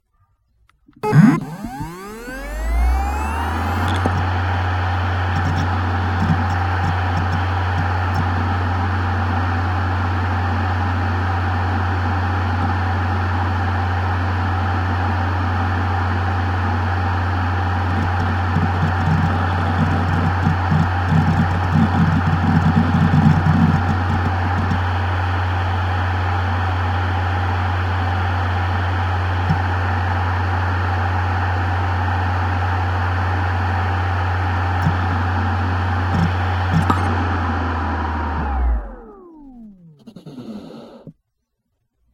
Contact recording of a Seagate 2TB Backup Plus Ultra Slim external USB hard drive